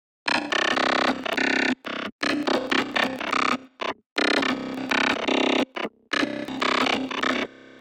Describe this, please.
FX made in Ableton Live
glitch, crackling, fx